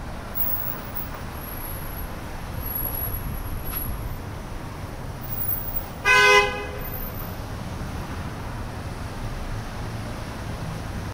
Car honk
With a bit of street ambi on both sides